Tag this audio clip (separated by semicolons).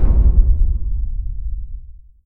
bang; bomb; boom; detonation; explosion